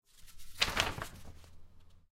Turning over a page in a book.
Recorded with Oktava-102 microphone and Behringer UB1202 mixer.